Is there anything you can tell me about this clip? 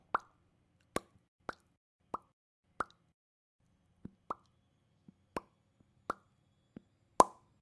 mouth pluck plop CsG
finger, human, mouth, plop